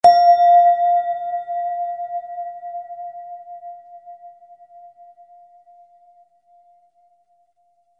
Synthetic Bell Sound. Note name and frequency in Hz are approx.